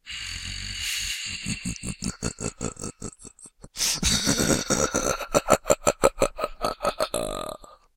Evil Laugh 2
cackle, evil, demented, halloween, laugh, maniacal